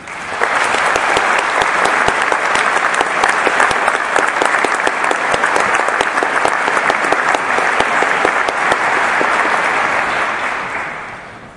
This recording was taken during a performance at the Colorado Symphony on January 28th (2017). Recorded with a black Sony IC voice recorder.